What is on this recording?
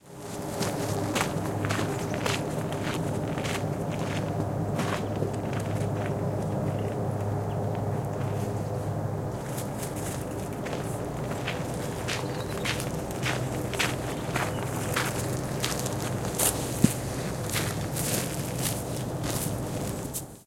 20220215.walking.under.02
Noise of footsteps along a dirt road, with helicopter overheading. Matched Stereo Pair (Clippy XLR, by FEL Communications Ltd) into Sound Devices Mixpre-3
aircraft, army, engine, field-recording, footsteps, gravel, helicopter, military, walking, war